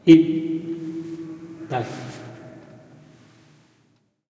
Voice reverbs. Primo EM172 capsules inside widscreens, FEL Microphone Amplifier BMA2, PCM-M10 recorder. Recorded inside an old cistern of the Regina Castle (Badajoz Province, S Spain)
basement, cave, cistern, dungeon, echo, field-recording, hall, male, reecho, reverb, reverberation, tunnel, underground, voice